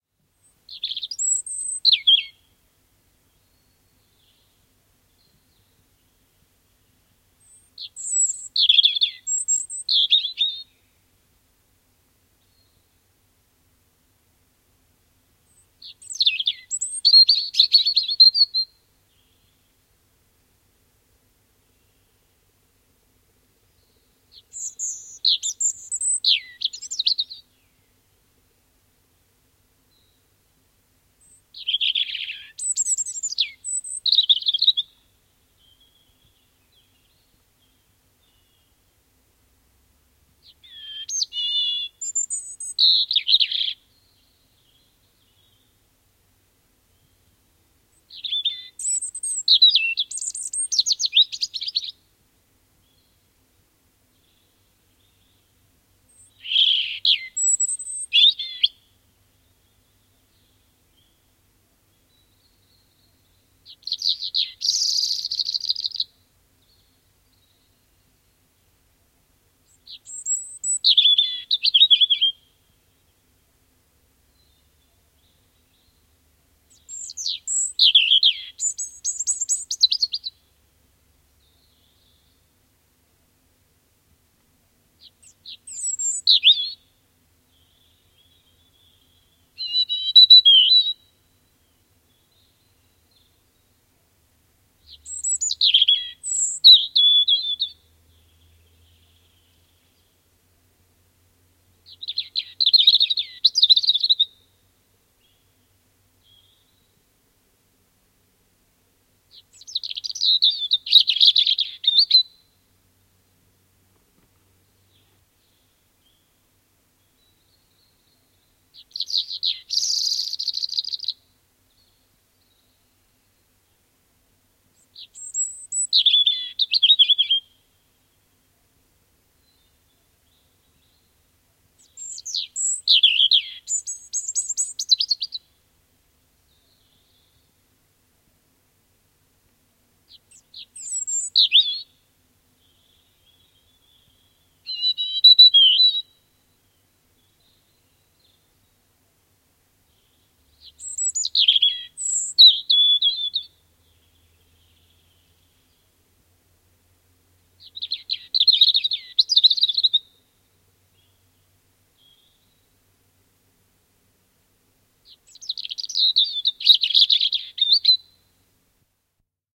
Punarinta, laulu / Robin, redbreast, clear song, singing in a quiet environment, parabolic mic (Erithacus rubecula)
Punarinnan puhdasta, kirkasta laulua hiljaisessa ympäristössä. Äänitetty peilimikrofonilla. (Erithacus rubecula)
Äänitetty / Rec: Analoginen nauha, Nagra, Peilimikrofoni / Analog tape, Nagra, Parabolic mic
Paikka/Place: Suomi / Finland / Liljendal
Aika/Date: 1973
Bird, Birds, Birdsong, Field-recording, Finland, Finnish-Broadcasting-Company, Linnunlaulu, Linnut, Lintu, Luonto, Nature, Punarinta, Resbreast, Robin, Soundfx, Suomi, Tehosteet, Yle, Yleisradio